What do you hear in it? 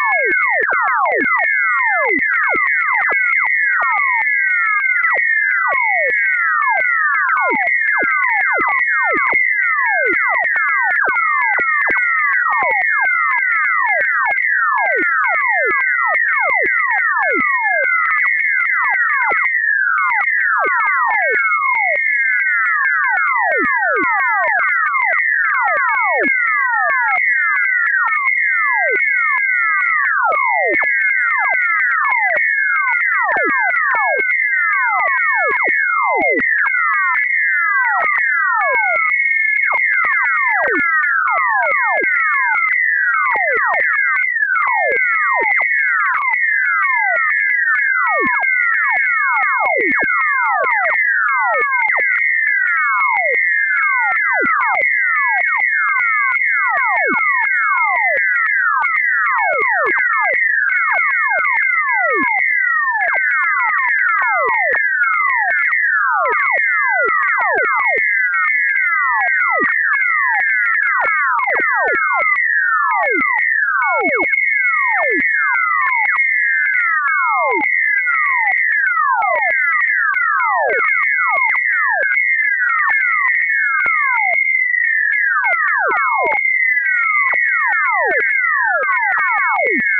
testing new generator. this one seems to imitate geomagmetic whistlers.